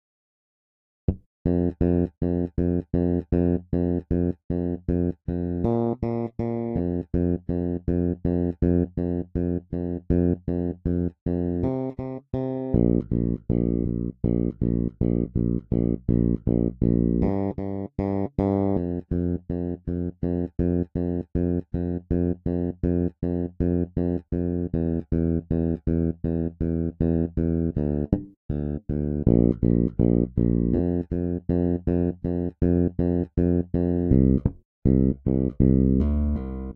Song2 BASS Fa 4:4 80bpms
Bass, 80, rythm, Fa, Chord